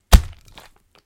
Some gruesome squelches, heavy impacts and random bits of foley that have been lying around.
death, foley, gore, mayhem, splat, squelch